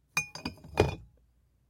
Short one bottle rolling and bumping another bottle. Glass-on-glass, tinging. Medium to low pitch.